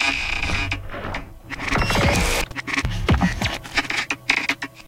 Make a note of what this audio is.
SoMaR BioTeK 99
Travel to the depths of Parallel Worlds to bring you these 100 sounds never heard before...
They will hear sounds of the flight of strange birds if they can be called that, of strangely shaped beings that emitted sounds I do not know where, of echoes coming from, who knows one.
The ship that I take with me is the Sirius Quasimodo Works Station, the fuel to be able to move the ship and transport me is BioTek the Audacity travel recording log Enjoy it; =)
PS: I have to give up the pills they produce a weird effect on me jajajajaja
from, Other, Sounds